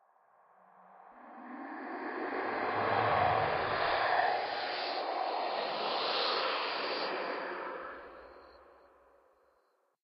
Untintelligible ghostly voices.